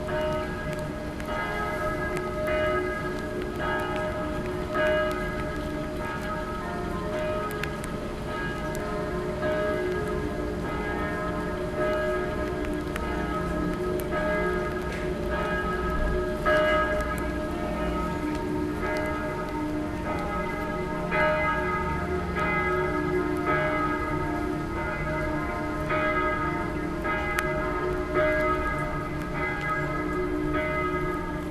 Church bells somewhere
Church bells, possibly recorded in Berlin, July 2011.